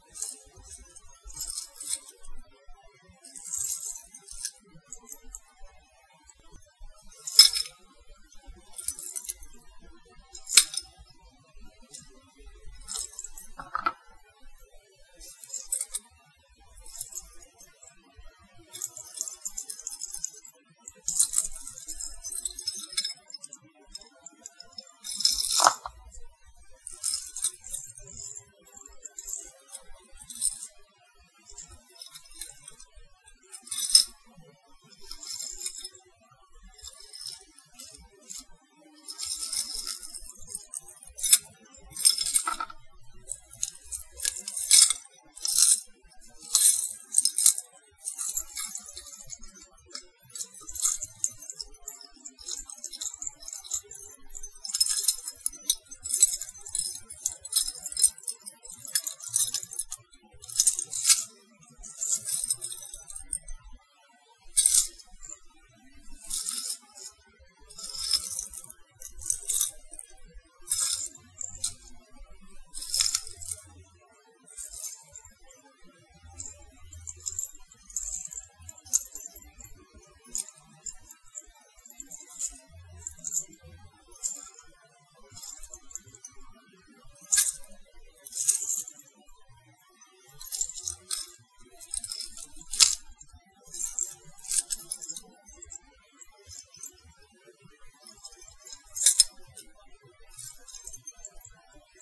playing around with some chains